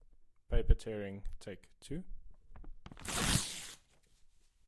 180081 Paper Tear 01 FAST
Quickly tearing an A4 paper
Fast, OWI, Packaging, Scratching, Tearing